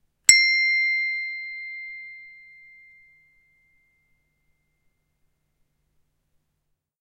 Hand Bells, High-C, Single
A single hand bell strike of the note High-C.
An example of how you might credit is by putting this in the description/credits:
The sound was recorded using a "H1 Zoom V2 recorder" on 15th March 2016.
bells, instrument, percussion, single